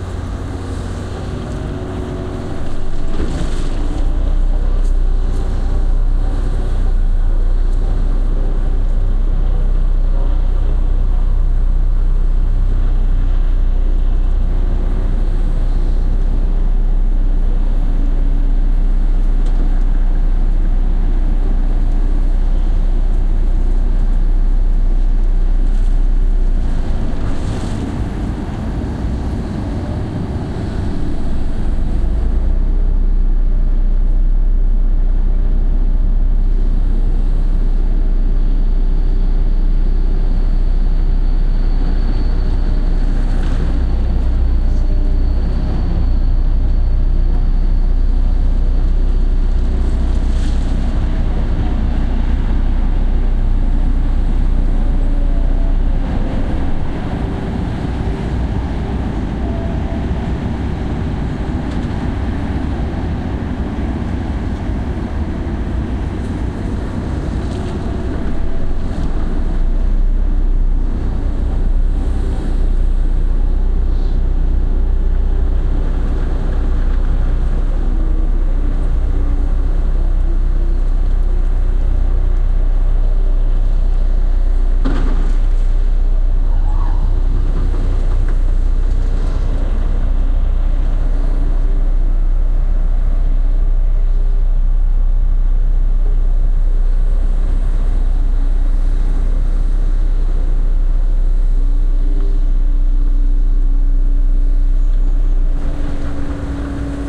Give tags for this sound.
ambient area building construction contract damaged destroying destruction dredger dump ecological engine environmental excavator garbage landfill lot noise site waste yard

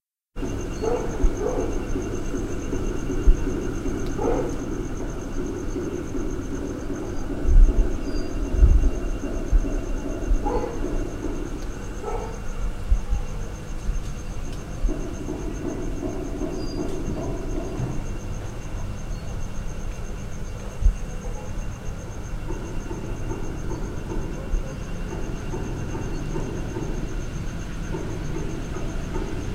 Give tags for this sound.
machines street temples thailand

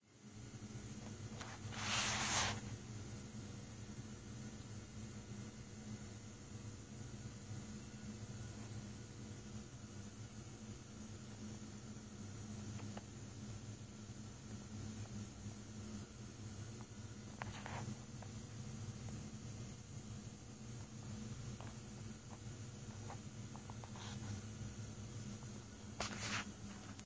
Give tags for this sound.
hum ambient buzz

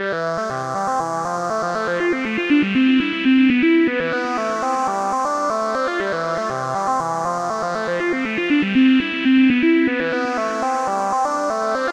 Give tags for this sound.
130 abstract bpm cool house space techno trance

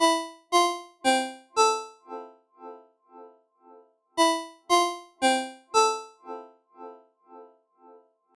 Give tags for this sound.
mills cellphone ring cell alert mojomills mojo ringtone alerts phone

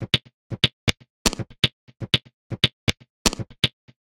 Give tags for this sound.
Abstract Loop Percussion

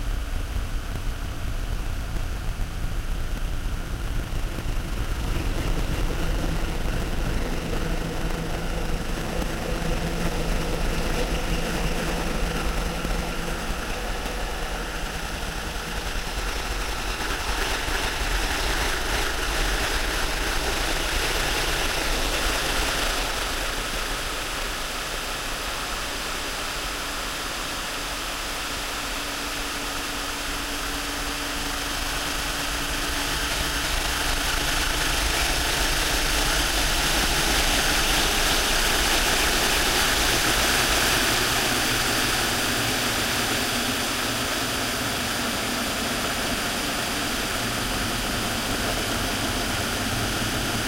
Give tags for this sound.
ambience processed sci-fi experimental soundscape electronic generative alien

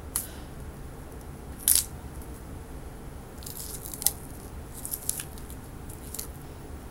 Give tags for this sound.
human crack horror people snap bone recording noise flesh soundeffect effect breath gore

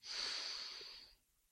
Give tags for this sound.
foley sniff

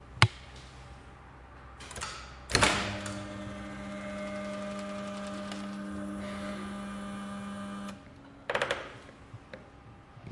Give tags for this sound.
automatic Cologne Door Field-Recording open University

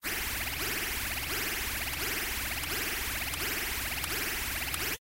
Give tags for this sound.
granular loop synthesis jillys

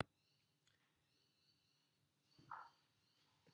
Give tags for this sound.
nothing silence silent

